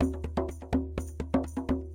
tambour djembe in french, recording for training rhythmic sample base music.
djembe, drum, loop